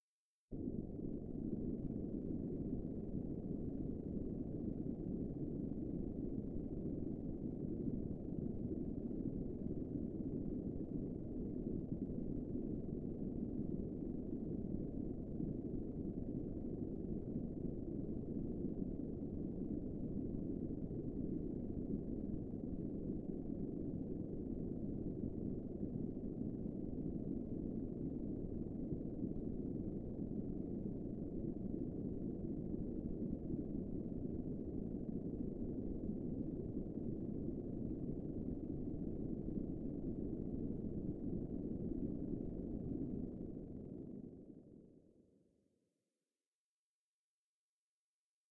spaceship rumble bg5
made with vst instrument albino